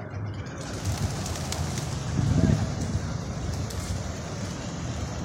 vuelo palomas SIBGA
Sonido de vuelo palomas, registrado en el Parque Santander, Cl. 36 #191, Bucaramanga, Santander. Registro realizado como ejercicio dentro del proyecto SIAS de la Universidad Antonio Nariño.
flying pigeons
Bucaramanga, Palomas, Parque, Santander